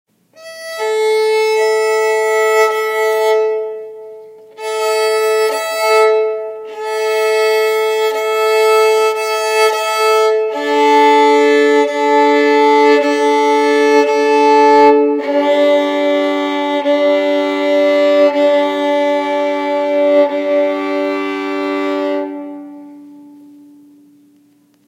Violin Tuning
For Me, it's very easy to tune my violin correctly by using the fine tuners, but if it's VERY out of tune, then I use the tuning pegs and try NOT to break my violin. However, this demo of me tuning my violin is actually used for the fine tuners. Then I added some Church Hall Reverb in Audacity, to make it sound like I'm getting ready for a concert.